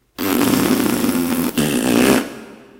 Vocalised farting noise #4. Recorded and processed on Audacity 1.3.12